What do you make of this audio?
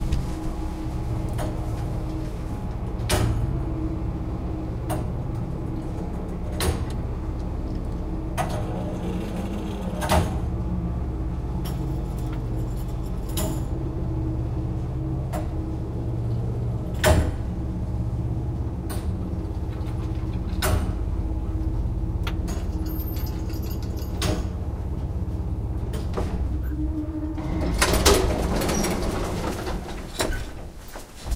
Elevator Way
This is the recording from an old Russian/Soviet elevator when travelling from 9th to 1st floor. The record contains movement noise and sounds of mechanical floor detection switches activating/deactivating.
ambience, atmosphere, elevator, field-recording, noise, ambient